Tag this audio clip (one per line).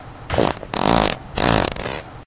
aliens
car
explosion
fart
flatulation
flatulence
frogs
gas
noise
poot
race
space